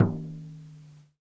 Tape Hand Drum 16
hand, mojomills, tape, drum, lofi, collab-2, vintage, lo-fi, Jordan-Mills